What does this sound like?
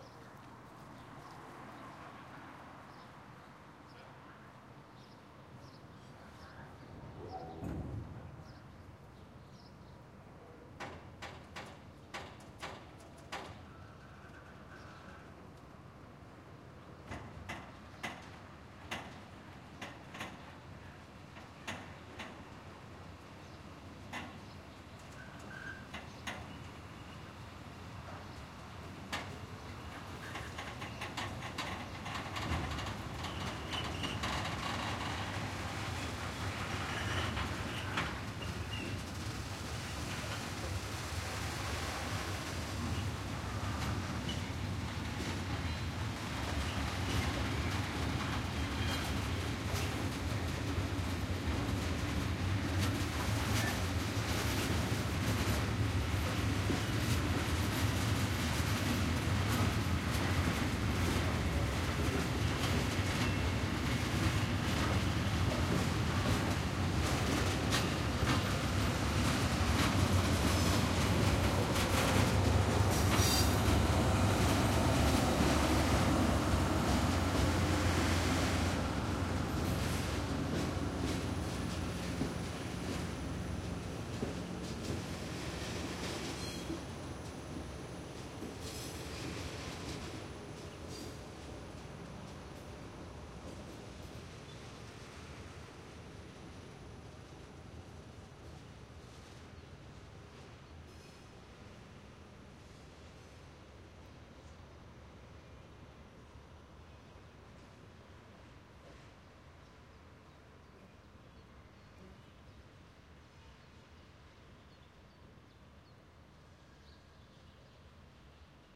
A heavy goods-train enters an empty station and breaks. Another train is pushed around a bit. You hear the creaking of the metal, the breaks squeeking and general metal-on-metal mayhem. Some background noise from cars and some birds tweeting in the background. Recorded using a Sony PCM-D50.
goods, metal, noise, wheels